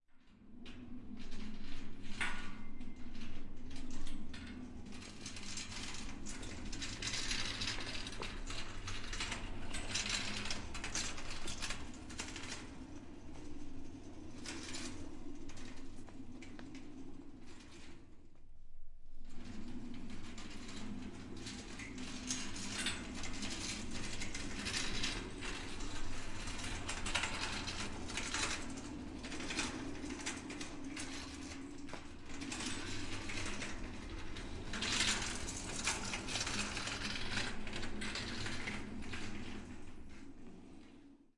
Industiral Ladder
Rolling around an Industrial Ladder around an open warehouse. Wheels are very noisy and ladder is clanky.
Recorded with HDR 702 and Sennheiser me-66 microphone.
clanky-wheels, Industrial, Ladder